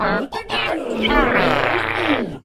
Robot Talk 02
A robot talking sound to be used in sci-fi games. Useful for robotic enemies and other artificial intelligent beings.
game, gamedev, gamedeveloping, games, gaming, high-tech, indiedev, indiegamedev, robot, science-fiction, sci-fi, sfx, talk